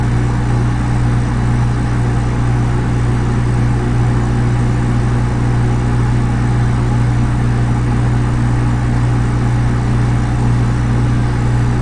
Refrigerator Humming
Amplified hum of the compressor of an ordinary house-hold refrigerator. Recorded with M-Audio Microtrak II.
appliance, drone, electric, refrigerator